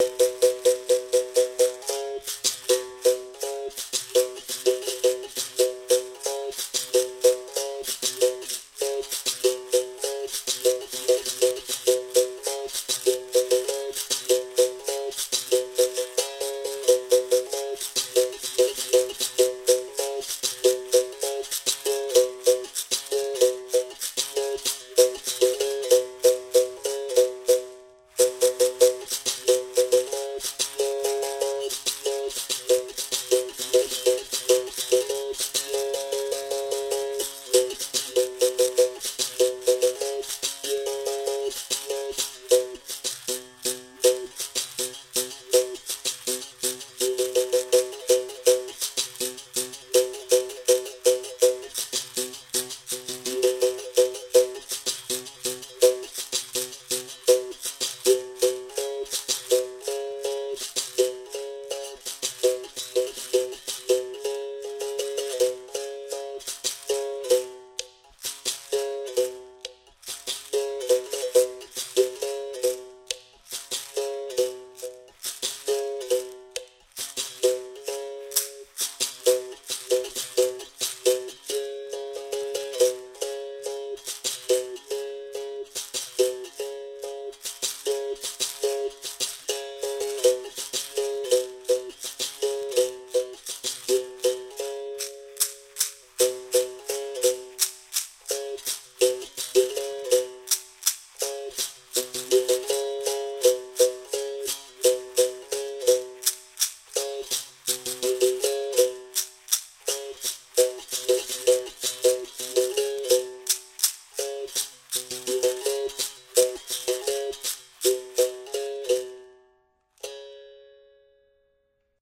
Calango2berimbau
Capoeira Mestre Calango was nice enough to do a couple of short recordings on the berimbau for me. A berimbau is a one stringed instrument with the string made from the inside liner of a truck tire, it has a claxia(sp?) a shaker with it and is modulated by placing the gourd against the stomach and removing it.
66, 67, birmbau, capoeira, hdp2, music, sennheiser, tascam